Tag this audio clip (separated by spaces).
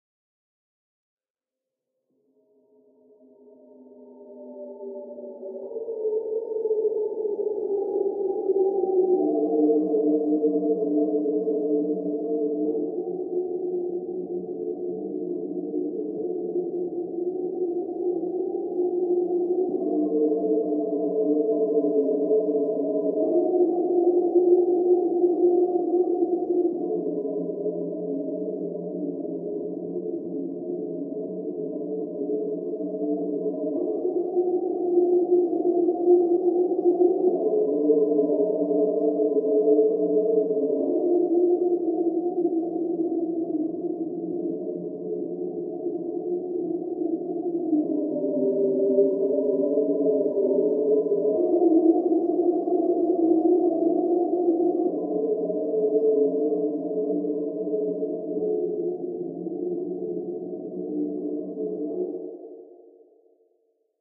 night pad ghost